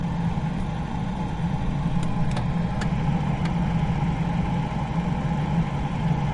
Refrigerator Running (interior)
Sound of a refrigerator running recorded from the interior.
running, kitchen, refrigerator, interior